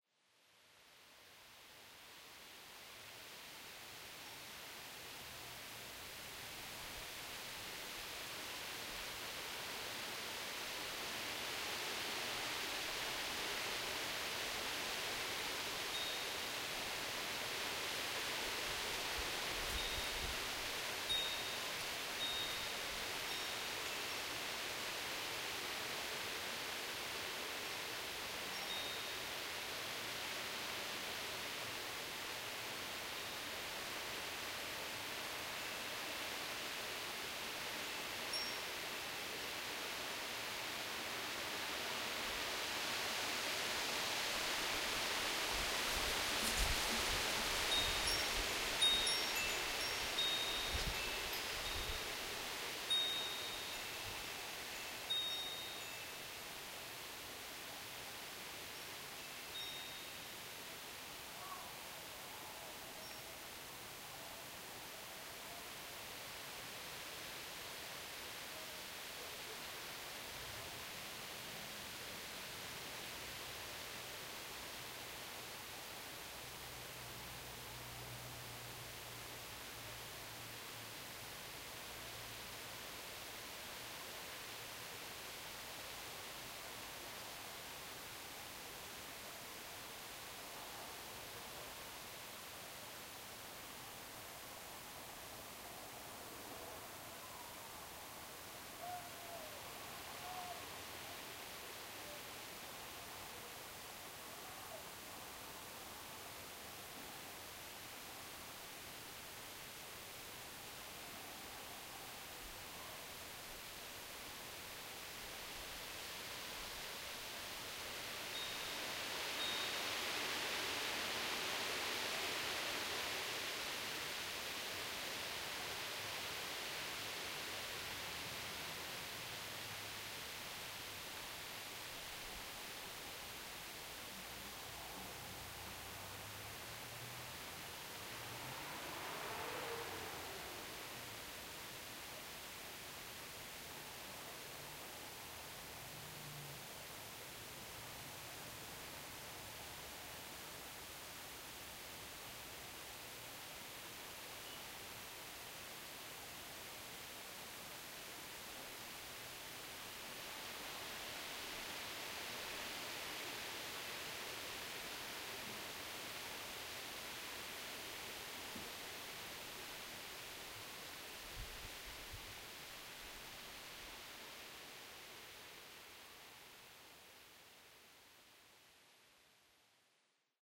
light wind with chimes 3min
calm light breeze with wind chimes in background
wind, field-recording, wind-chimes, weather, thunder, rumble, storm, mother-nature